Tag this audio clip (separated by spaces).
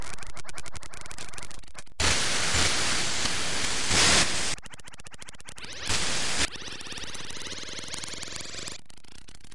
sound-design 2-bar processed loop hiss pitched